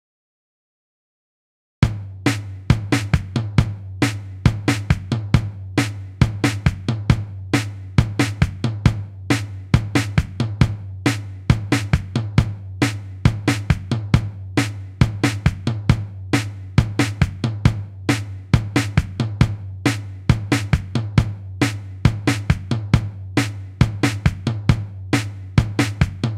Kastimes Drum Sample 4
drum, rhythm, sticks, groovy, percussion, music, percussion-loop